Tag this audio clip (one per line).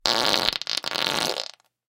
trump
embouchure
breaking
amek
farts
noise
bottom
flatus
c720
wind
rectal
bowel
brew
josephson
flatulate
brewing
flatulence
gas
passing
flatulation
rectum
fart
farting
bathroom